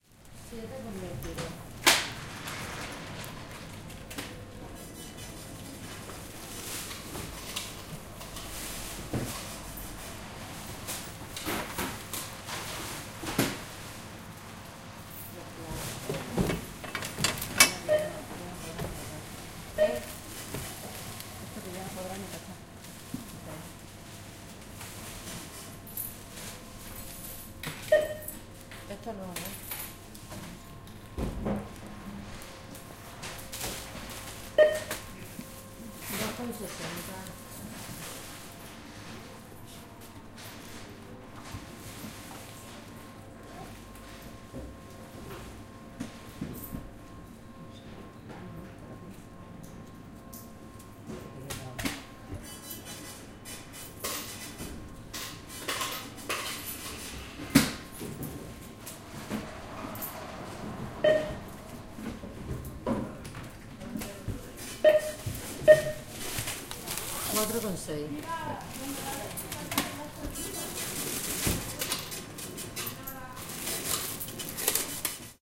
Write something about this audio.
Supermarket. People talking in Spanish. Beep from the cash machine.
20120326